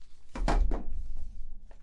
02 - Abre puerta
The sound of double doors being opened.